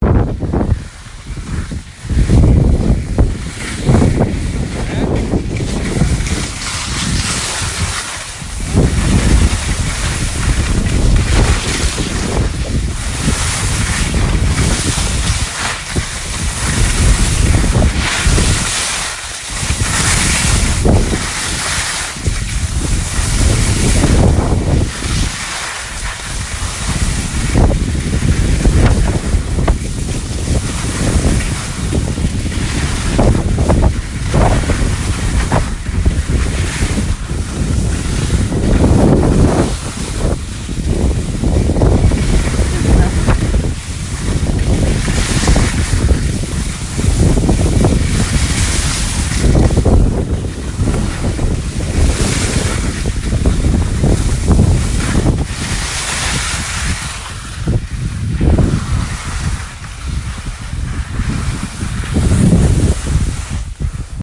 The sound of skiing down a mountain
Speed, sports, alpine, mountains, ski, mountain, fast, skiing